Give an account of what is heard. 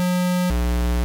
Used in my game "Spastic Polar Bear Anime Revenge"
Was synthesized in Audacity.